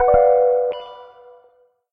GUI Sound Effects 073
GUI Sound Effects
Beep, Design, Interface, GUI, Game, Menu, Sound, Effects, SFX